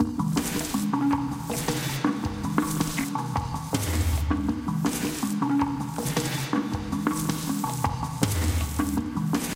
Some Drums
Drum, MPC, Sample